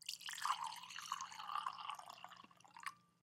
pour water2
Pouring water in a small glass
drip, pour, water